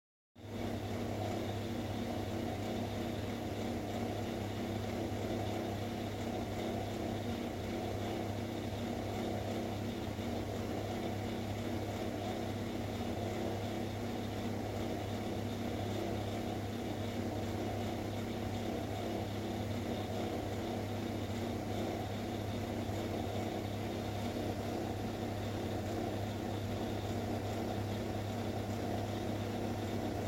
Table Fan
wind, machine, blow, fan, blowing, air